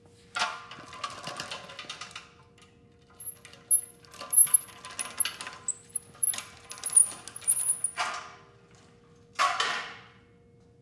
A 2 wheel hand truck rolled past microphone and put upright.
Recorded with AKG condenser microphone M-Audio Delta AP